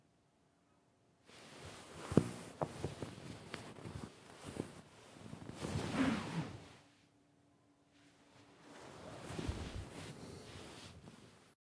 couch quick rise up 2 bip
Lying down, rubbing pillow, sitting up quickly, then dropping back down
couch, drop, fabric, lying, quick, rise, sitting, up